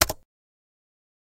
MECH-KEYBOARD-01
06.22.16: A keystroke from my Razer Blackwidow (2013)
button, click, clicking, computer, key, keyboard, keystroke, mechanical, mouse, press, short, switching, tap, thack, type, typewriter, typing